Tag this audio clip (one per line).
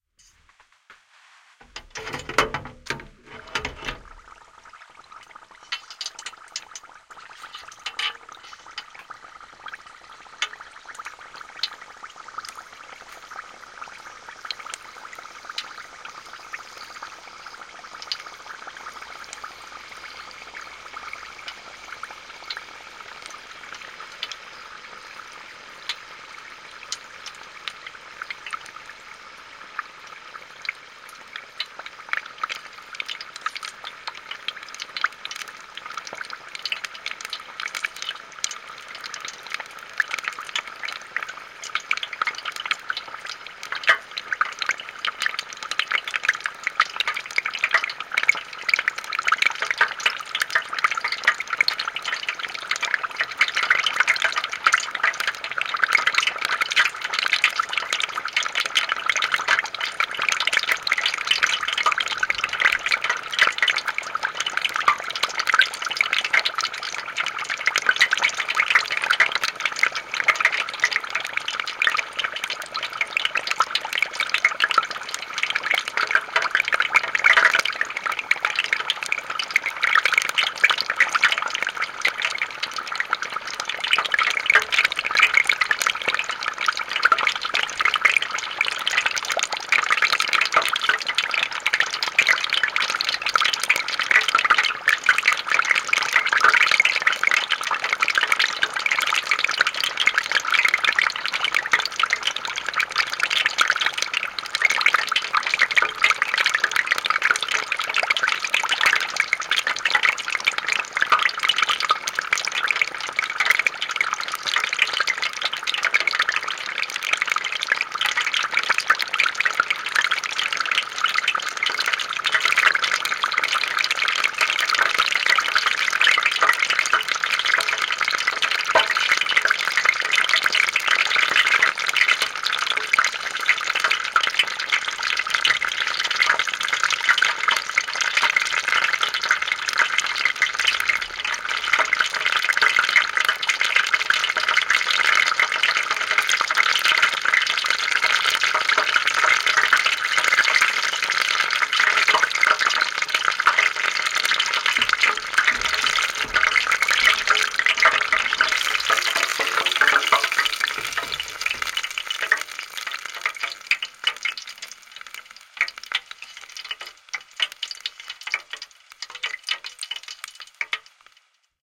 xy
deep-frying
frying
stereo
cooking
oil
sizzle